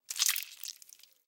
blood squirt gob splash sponge
blood, gob, splash, sponge, squirt